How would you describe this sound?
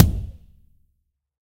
MTLP KICK 003
Several real kick hits layered and processed. Includes ambient samples captured in a 2400sqft studio. Example 3 of 3
bass, drum, kick, processed, real, sample